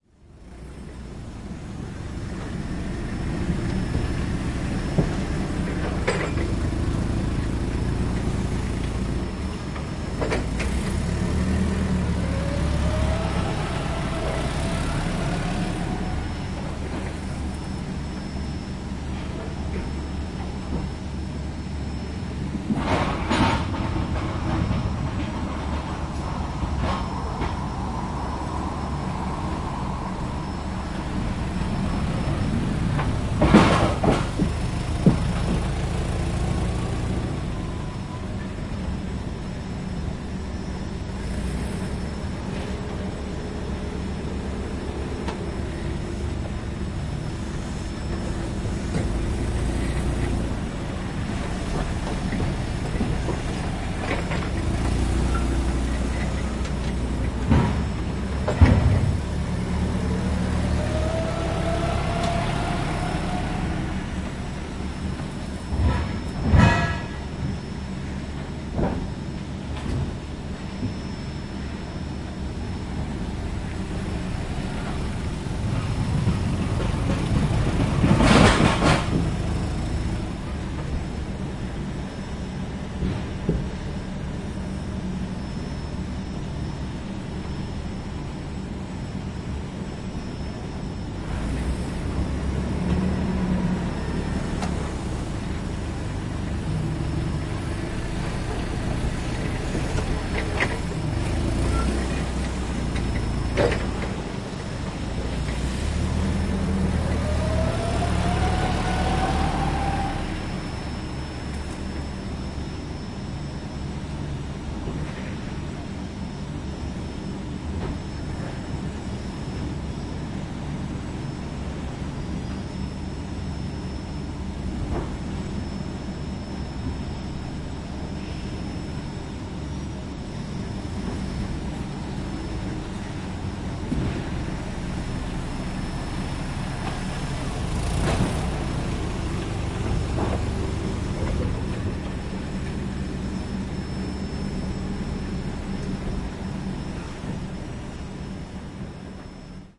110802-organizing silos
02.08.2011: third day of the research project about truck drivers culture. Neuenkirchen in Germany. Fruit-processing plant (factory producing fruit concentrates). Organizing silos in front of the processing hall. Sound of forklift and refrigerating machine.